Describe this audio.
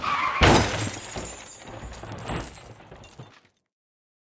Layered car crash sound effect. Created using a noise box (Quality Street) filled with grit, broken glass and other bits and bobs. Also used a recording of a metal filing cabinet being hit for that metallic impact.